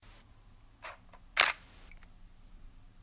Camera Shutter
Camera takes a picture